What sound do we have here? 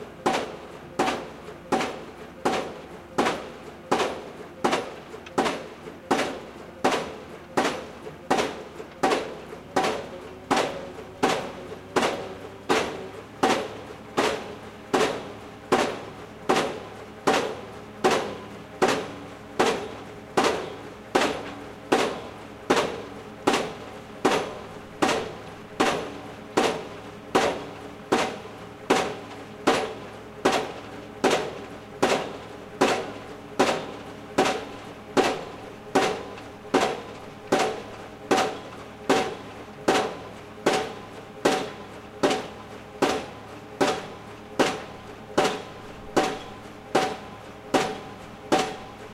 Recorded at York University UK using Edirol R4 recorder and Rode NT4 mic. Edited in Wavelab with L1 Ultramaximizer dither added.
Bang!
construction, field-recording, machinery, piledriver